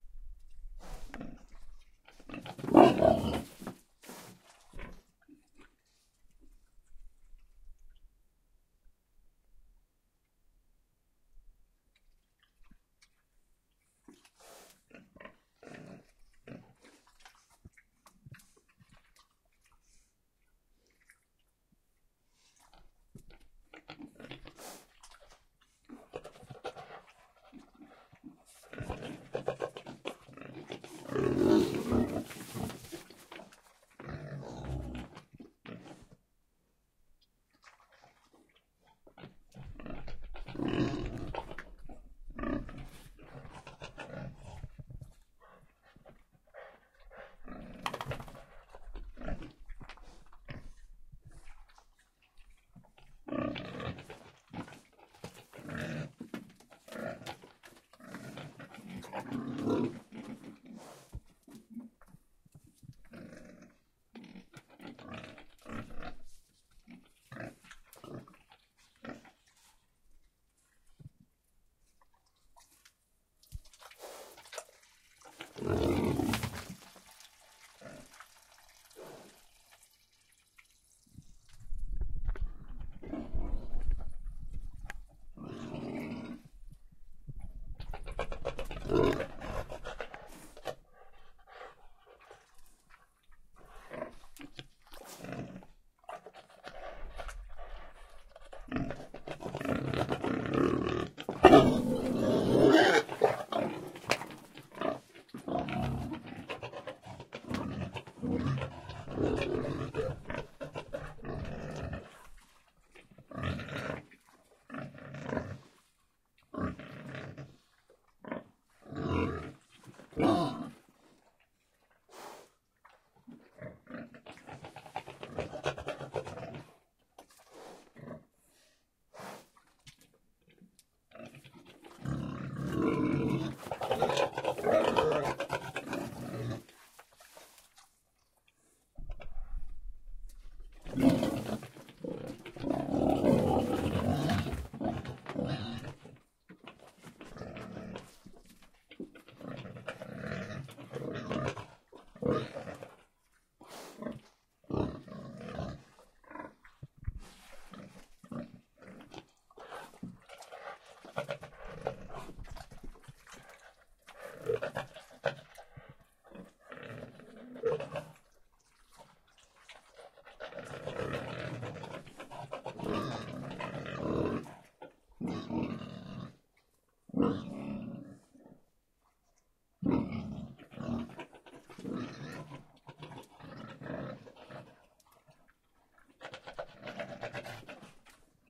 | - Description - |
Group of pigs making noises in their pen, recorded in a rural area.